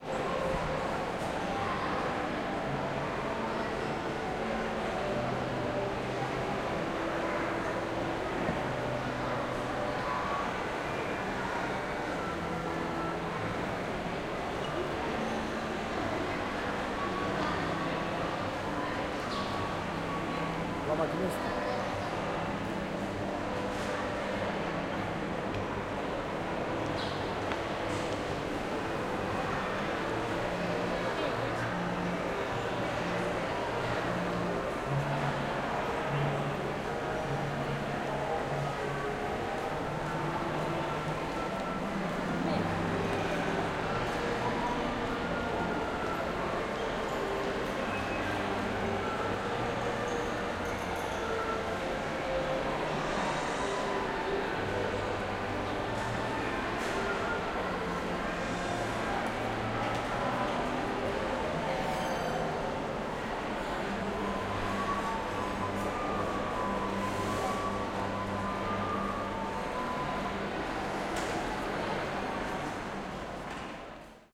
CENTRE COMERCIAL HERON CITY BARCELONA
Commercial Centre Heron City in Barcelona Catalunya
ambience, centre, commercial, kids, people, playing, shopping